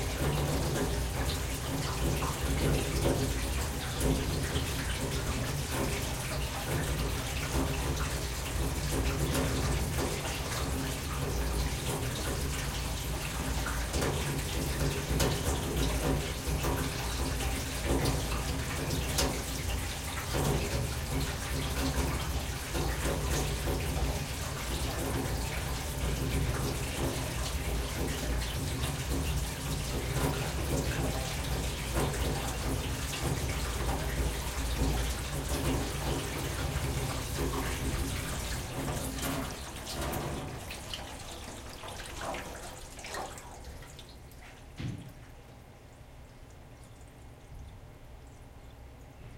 water drain from large metal sink gurgle pipe
drain, from, gurgle, large, metal, pipe, sink, water